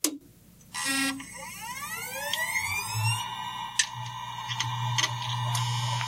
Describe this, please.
Sound of a mechanical hard drive being spun up after pressing a power button. Recorded with a Shure SM58 and post processed with Audacity.
computer, drive, electronic, hard, hdd, machine, mechanical, motor